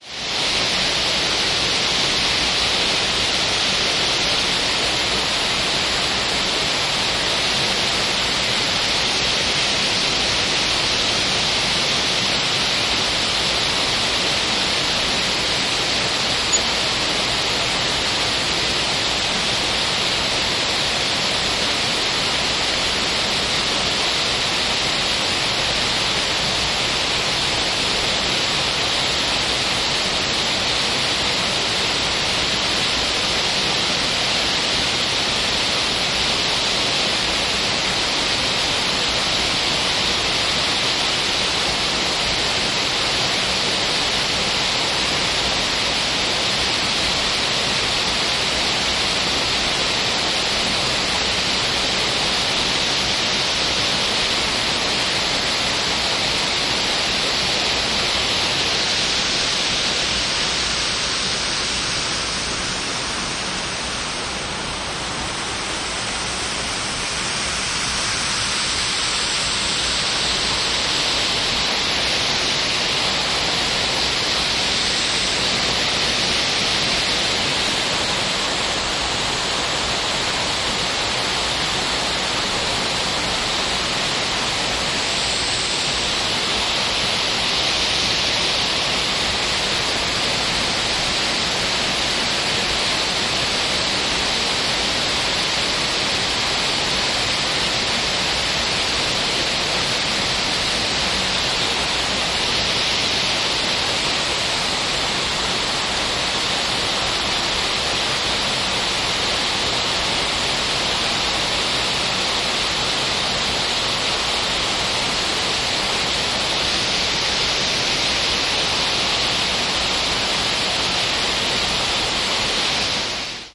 Binaural recording of a waterfall at Ripley Castle, North Yorkshire, UK.
Recorded with a pair of Primo EM172 mics into a Zoom H2.n
Head 'panning' at 0:58.